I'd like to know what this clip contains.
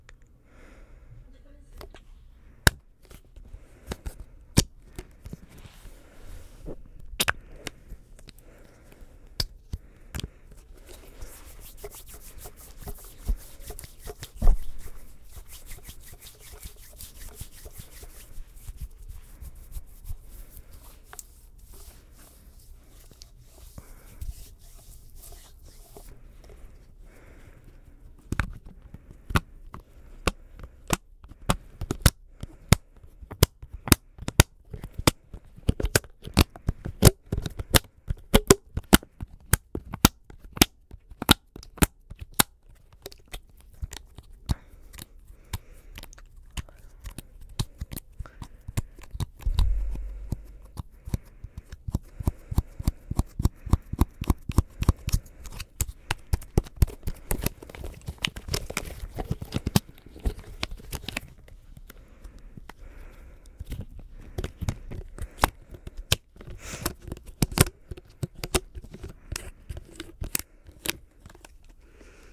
Gravado para a disciplina de Captação e Edição de Áudio do curso Rádio, TV e Internet, Universidade Anhembi Morumbi. São Paulo-SP. Brasil. Utilizado mirofone condensador. Abrindo pote de plástico, mexendo no silicone, esfregando as mãos umas nas outras, estralando o silicone.